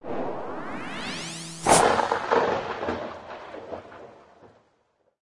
A request from "zeezack" for a teleportation sound. This is my effort using a telephone buzzing noise I created with an fm synth - pitched up at two different frequencies, pitched down at another - remixed and with reverb added along with a slight electronic zap and a cleaned up thunder clap.
Enjoy making things disappear into the quantum realm.